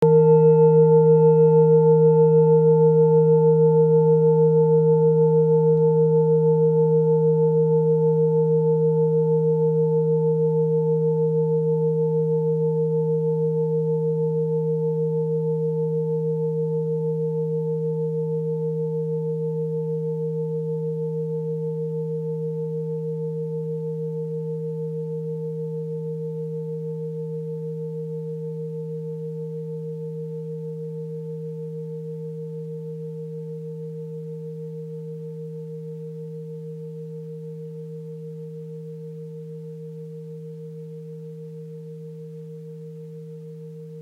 Aud 10” ancient Tibet bowl pure vibrations
10” ancient Tibet bowl pure vibrations
Deep healing vibrations from a tibetan singing bowl